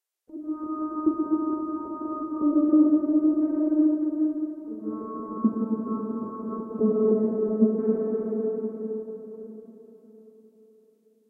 horror effect4
made with vst instruments